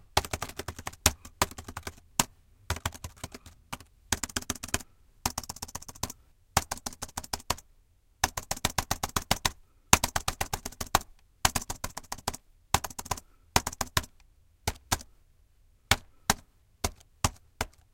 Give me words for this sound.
With exhuberant rage, the keyboard is mashed upon. Recorded in stereo with a Blue Yeti, using a 17 inch lenovo laptop.